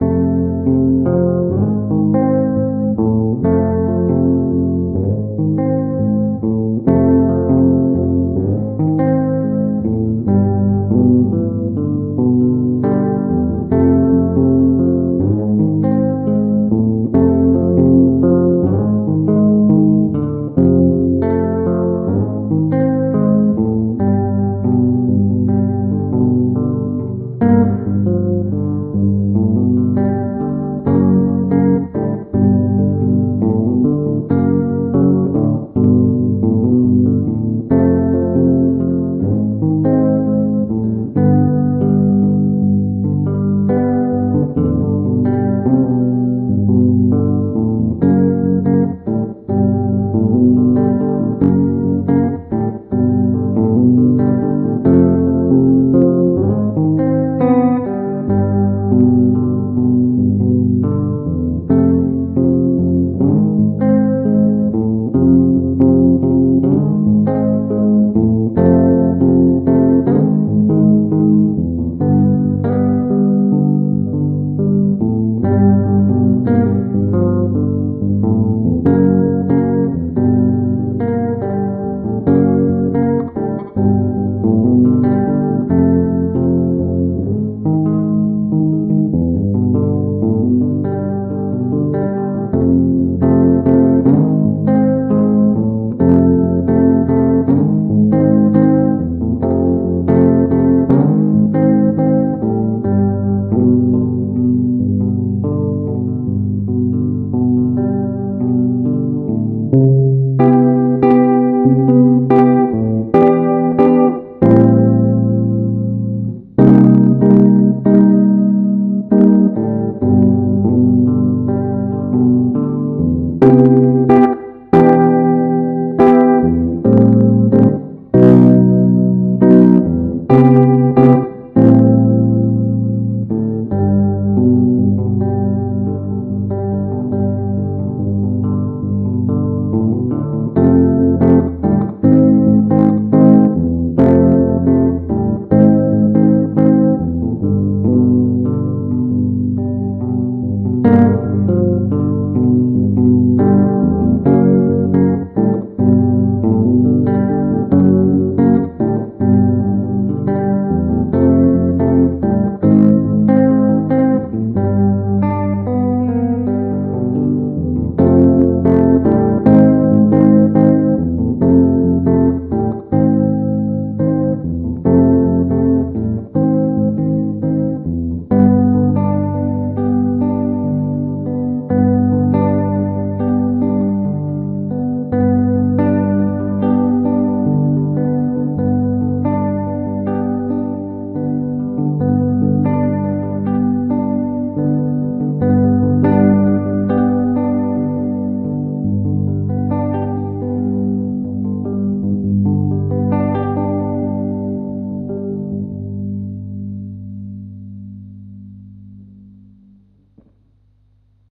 Gmajor folktheme 70bpm
guitar, 70bpm, folk